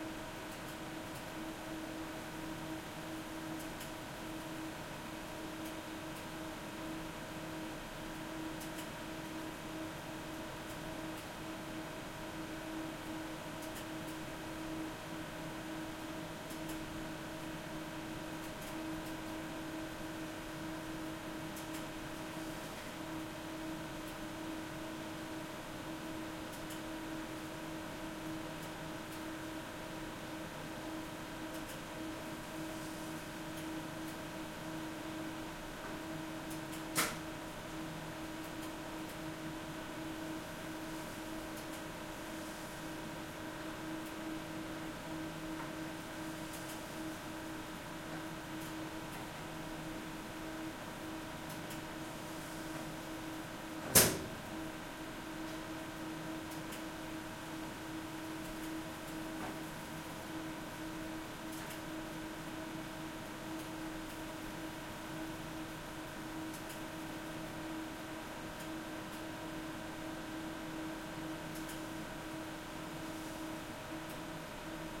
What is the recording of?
A recording of the atmosphere in the furnace room in the basement of a school building.
This recording was done using a Zoom H6 with the MS (Mid-Side) capsule.
Here are some pictures of the room that this sound was recorded inside of. This recording was done in the same position as the second picture at almost the exact same spot.